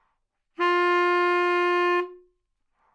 Part of the Good-sounds dataset of monophonic instrumental sounds.
instrument::sax_baritone
note::F
octave::3
midi note::41
good-sounds-id::5268